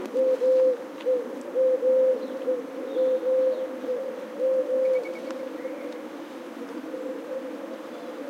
pigeon.parque
Single pigeon echoing in a park, and wings of another bird that flutter near the mic /paloma con eco en un parque, otro pajaro aletea cerca del microfono
birds, city, field-recording, nature